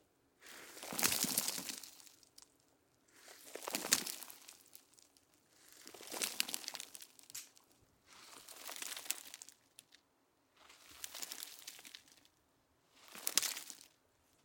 Dirt Slide 02
Light dirt rolling down a slope.
Rode M3 > Marantz PMD661